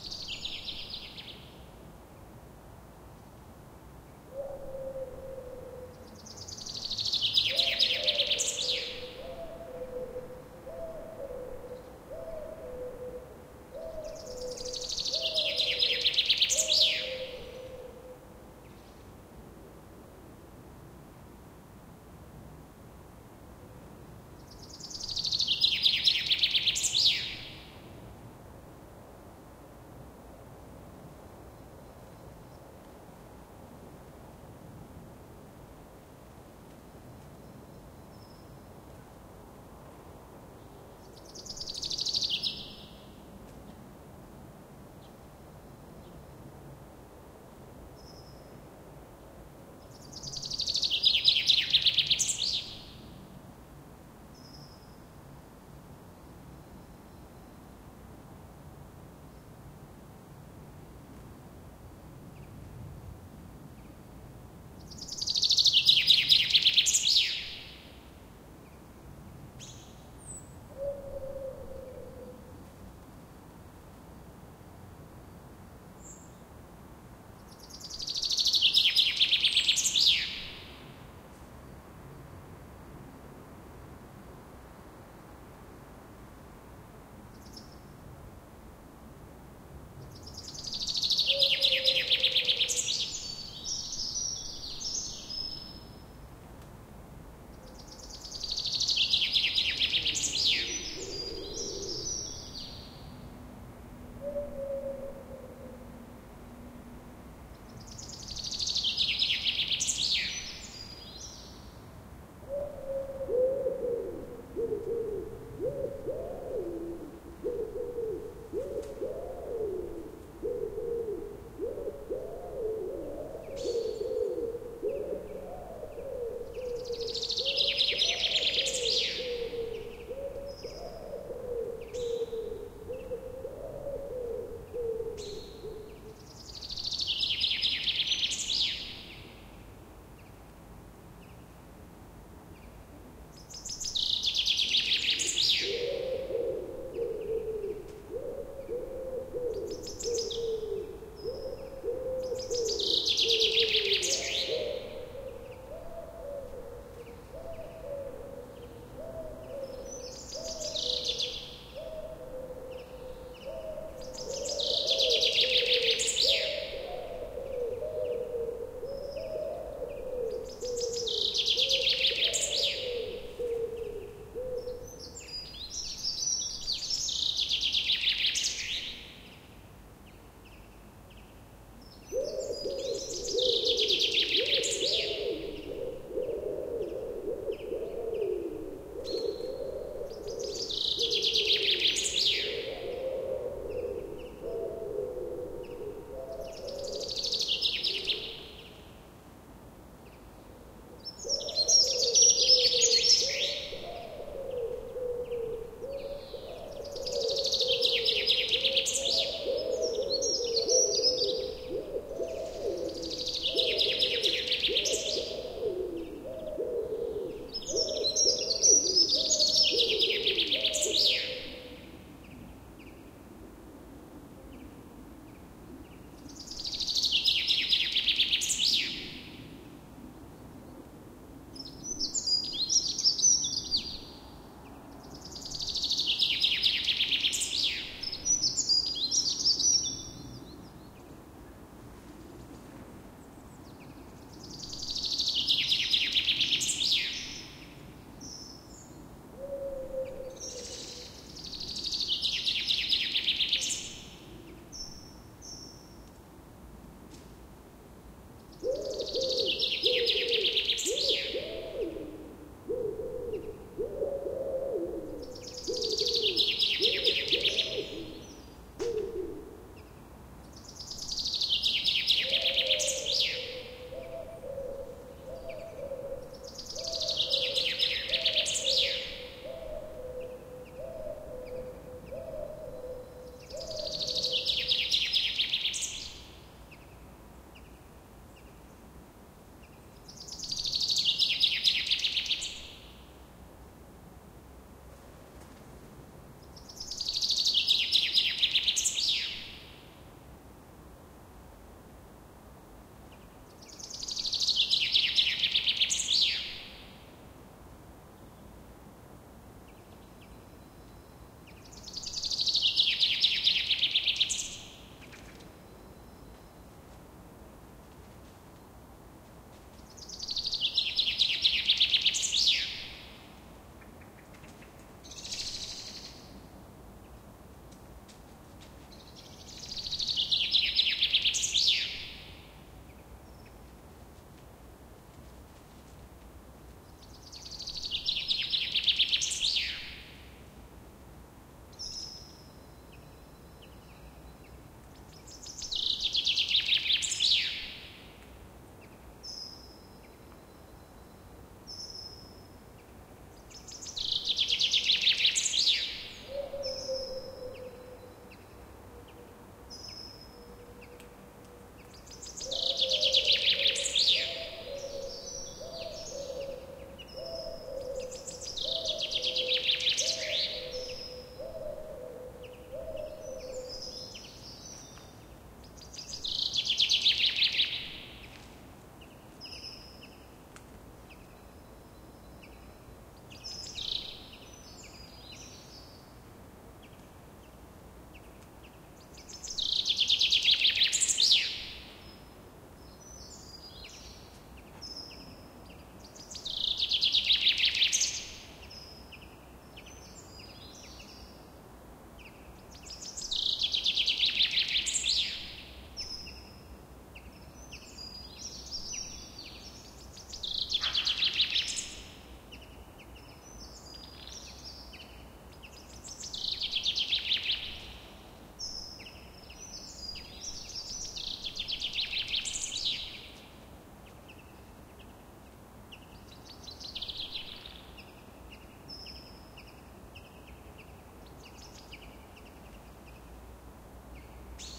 The typical sound of spring, or so I think. A chaffinch in town. Recorded in the middle of march, early in the morning, with the AT 835 ST microphone into the Shure FP-24 preamp and all that into a R-09HR recorder.
birdsond, spring, chaffinch, springtime, field-recording